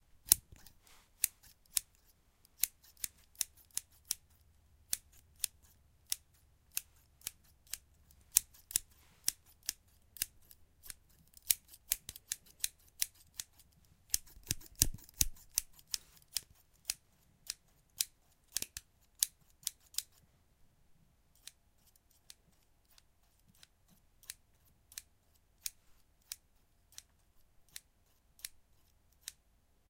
scissors multiple
Multiple scissors sounds, choose you own own single sound or use the lot. I've tried to include lots of variation.
hair, hairdressing, cutting, cut, multiple, scissors